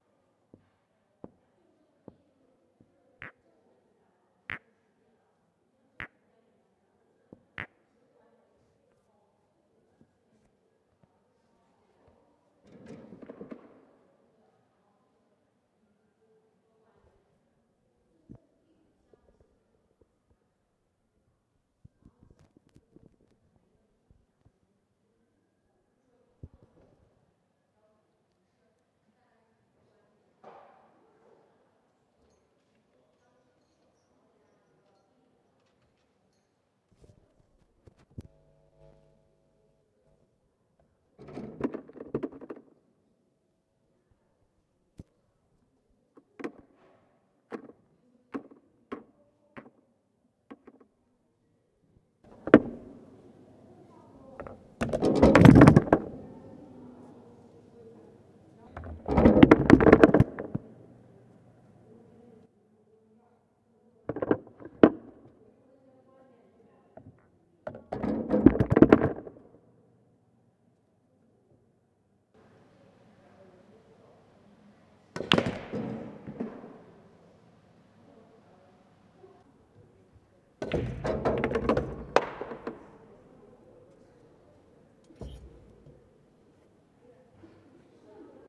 about billiard ball
Some sound about the billiard ball.
I recorded it using Tascam dr60dmkii with a shotgun microphone at "Bitpart Cafe" in my school.
It is not a studio recording; therefore not so clean.
dark, movie, soundtrack, sounddesign, entertainment, foley, billiard, cinematic, ball, film